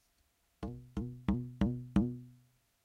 didge-slap
4 times a short slapping sound made with the hand on a didgeridu's mouthpiece. No effects added, no edits made. Recorded with Zoom H2n and external Sennheiser mic. Useful as percussive accent.
The money will help to maintain the website: